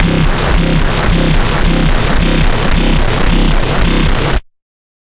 110 BPM, C Notes, Middle C, with a 1/4 wobble, half as Sine, half as Sawtooth descending, with random sounds and filters. Compressed a bit to give ti the full sound. Useful for games or music.
1-shot, bass, digital, dubstep, electronic, Industrial, LFO, notes, porn-core, processed, synth, synthesizer, synthetic, techno, wah, wobble